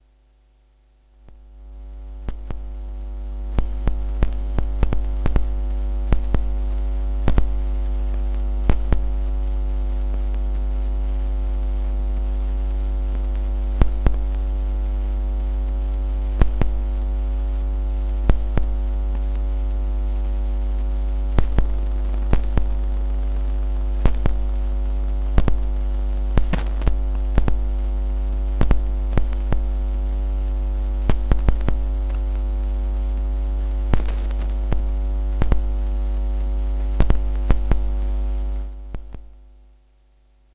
An LG G2 mobile phone's background interference when plugged into my DR-01 over 1/4".